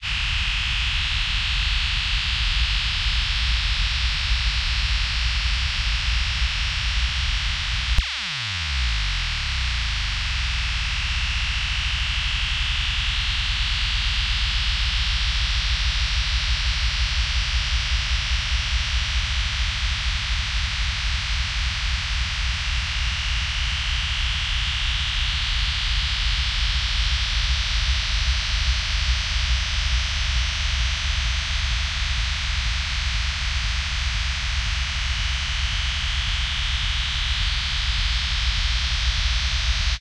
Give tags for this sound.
loop musical sequence sound space

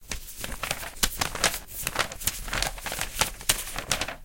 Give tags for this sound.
book hurry hurry-up magazine page pages paper read reading turn turning

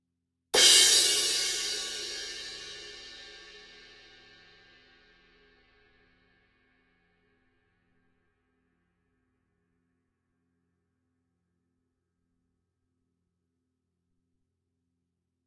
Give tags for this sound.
19 Crash Dark Harder Hit K Medium Thin Zildjian

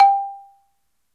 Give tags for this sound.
percussive balafon

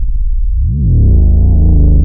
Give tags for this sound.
alien ambience big city craft cyberpunk dark deep disturbing dramatic drone eerie engine epic evil factory futuristic haunting humm industrial low mystical robot Science-Fiction Sci-Fi spaceship terror texture vessel

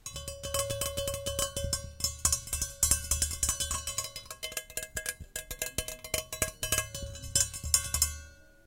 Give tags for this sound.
trumpet experimental

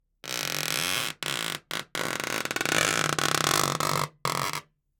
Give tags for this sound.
Balloon Board Close Creak Door Floor Long Rope Rub Ship Squeak Wood

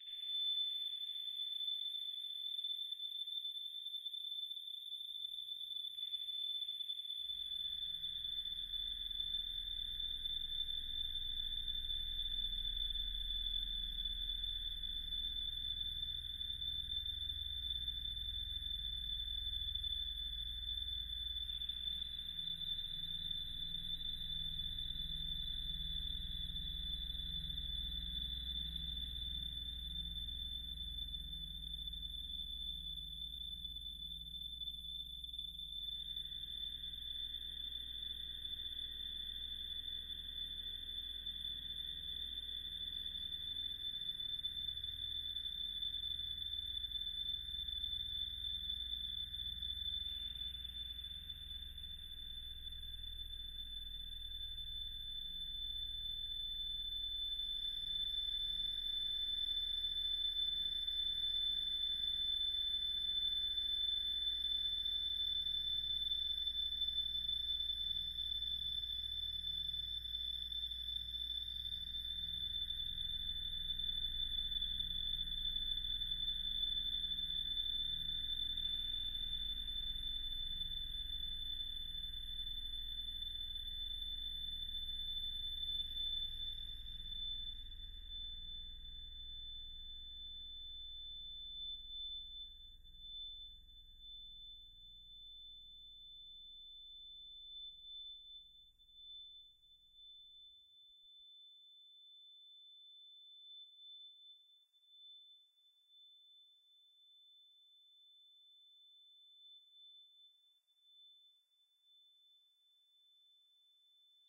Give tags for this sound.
multisample overtones drone pad ambient

